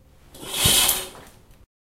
Curtain Open Recording at home